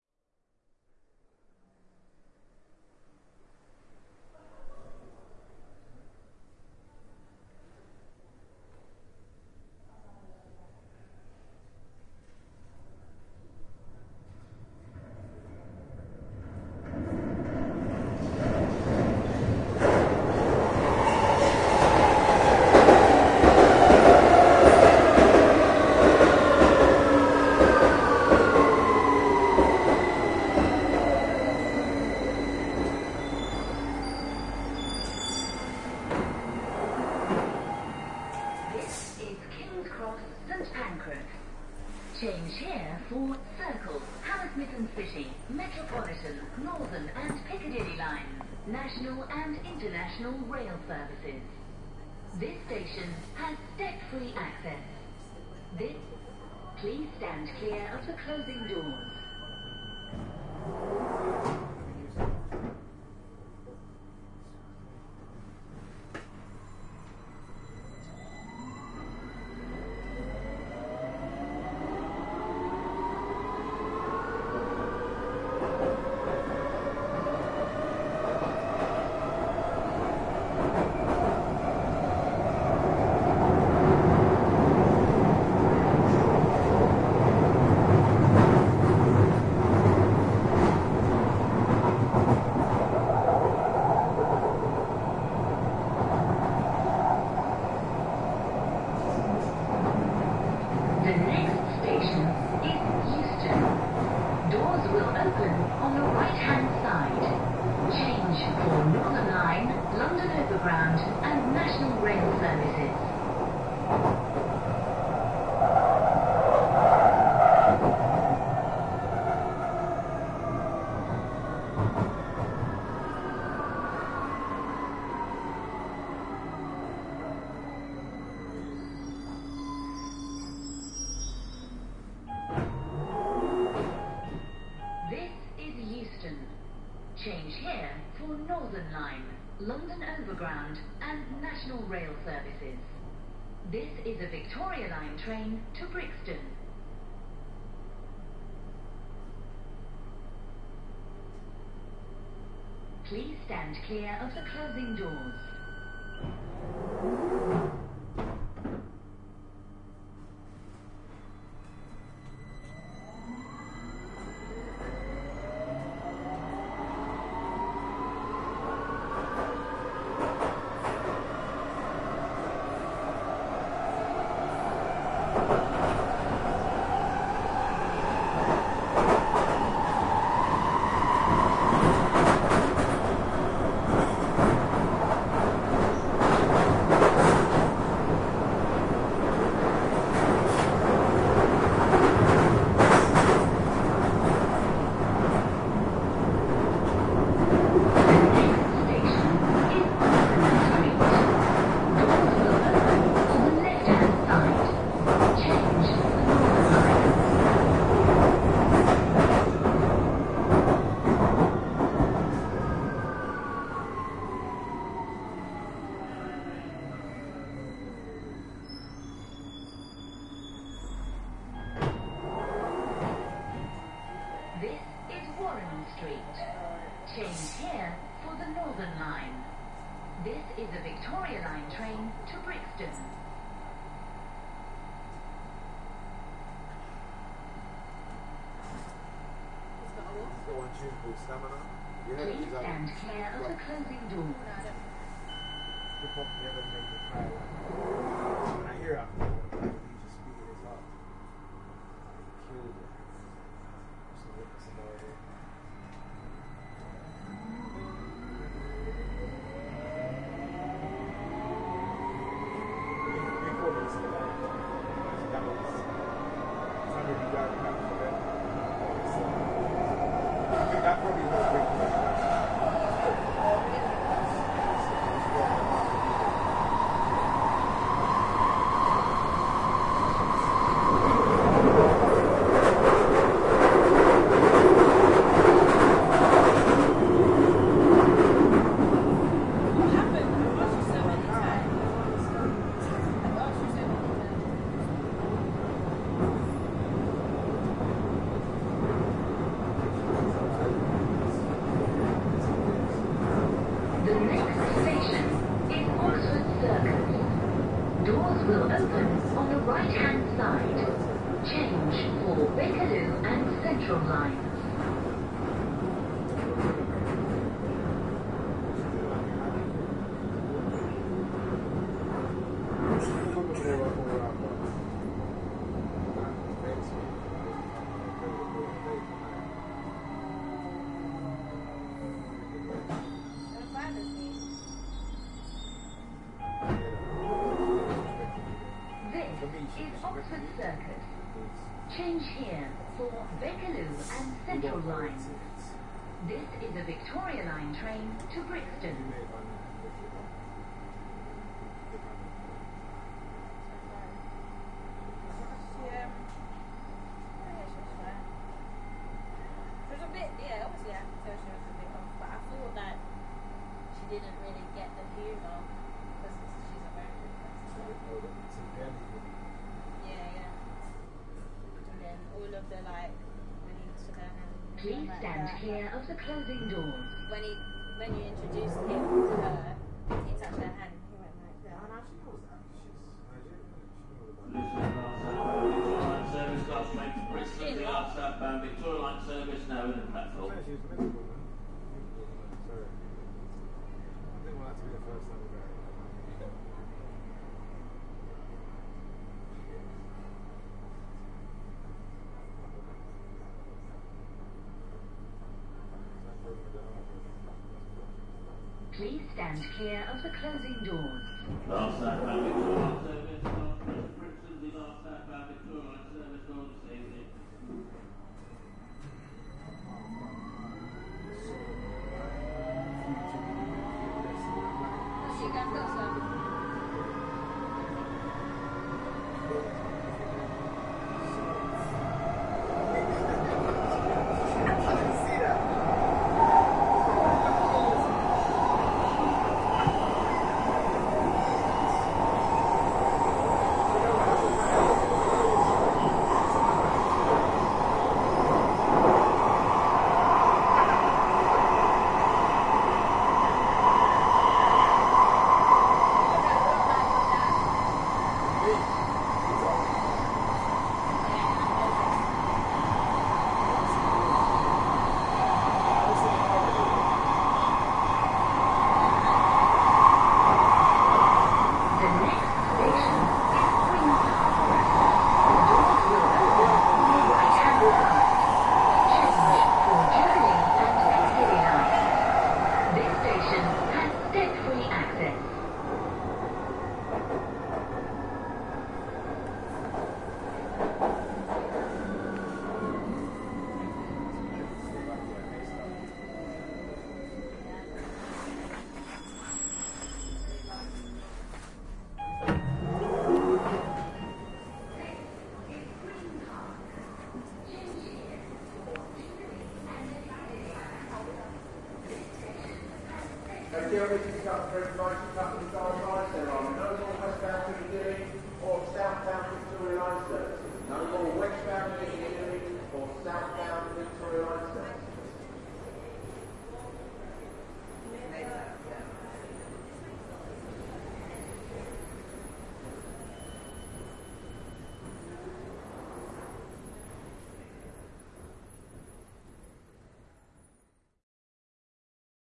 London Underground - Last Train to Brixton
The last train to Brixton.
A late night journey on the London Underground from Kings Cross to Green Park, stopping at Euston, Warren Street and Oxford Circus before arriving at Green Park. The recording starts before boarding the train, continues for the entire journey and finishes after getting off at Green Park. Various announcements can be heard throughout.
Recorded on Yamaha C24